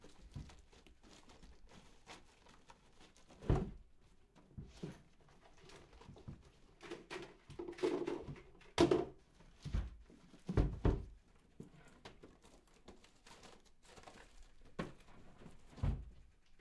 Room Rummaging
The sound of someone rummaging through an adjacent room